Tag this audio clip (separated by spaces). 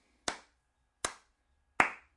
Irony Sarcasm